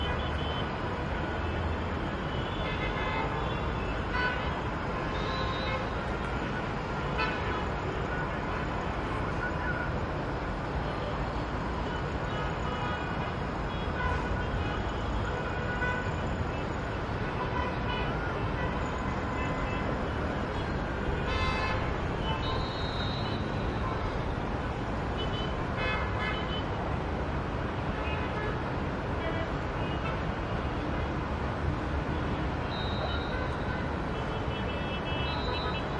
skyline Middle East distant traffic horn honks and city haze03 Gaza 2016
city, East, haze, Middle, skyline, traffic